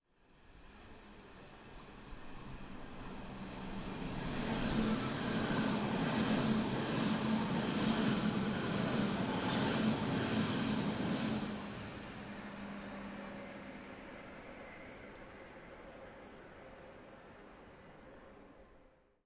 S-Bahn city train passing. As heared on the bridge above the railway tracks. Recorded in 90° XY with a Zoom HD2 at Priesterweg, Berlin, in September 2016